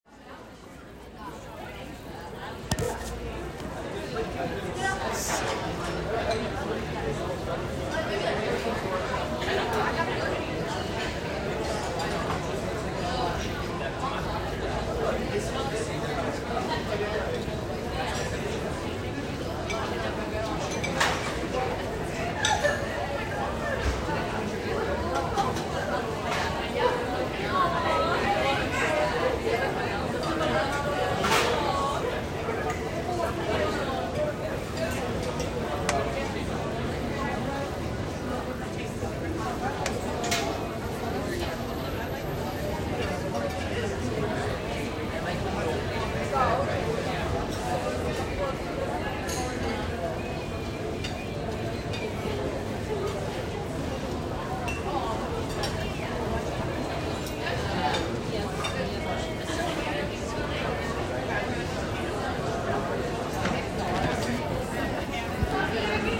Busy Dinner in the Street Conversation sounds in Lisbon Portugal
Recorded this from my 2nd floor balcony in Lisbon, Portugal. It closely overlooked a small street in which restaurants had set up outside dining. Many people were out this evening, eating, talking and laughing.
Dinner, night, conversation, Lisbon, nighttime, balcony, outside, laughing, plates, Portugal, talking, busy, street, silverware